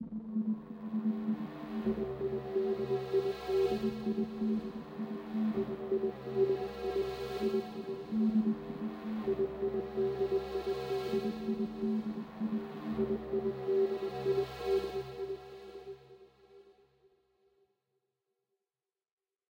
dark atmosphere
ambient, dark-atmosphere, dub-step, fx, techno